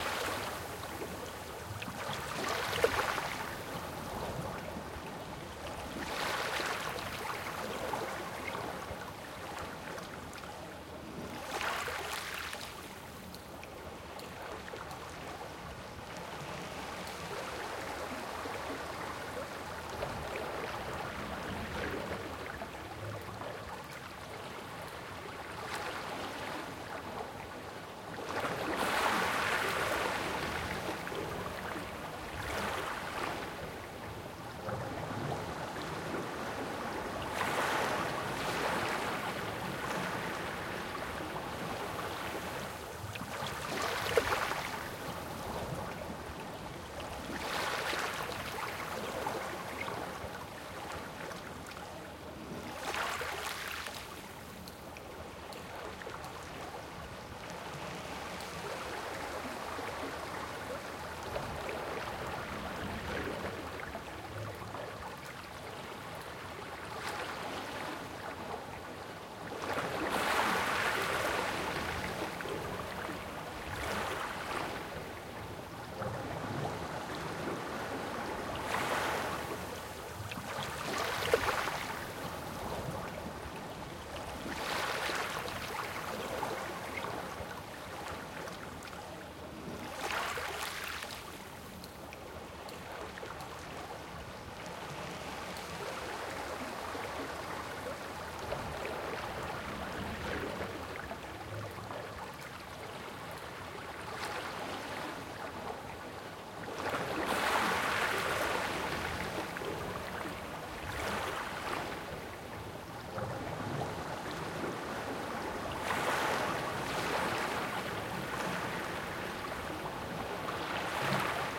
Water,Lapping,Rocky,Beach,Ambiance
Water lapping recorded on a very small beach in my hometown, it has very distinctive rocky reflections
Used a pair of shure SM81 to sounddevices 702T